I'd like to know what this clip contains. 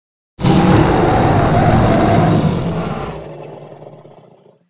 Trex roar
In an attempt to re-create the original Jurassic Park t-rex roar, I created this. Not perfect, but pretty close!